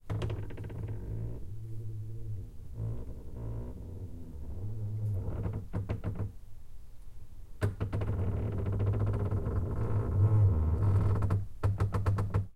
Opening an old noisy door carefully.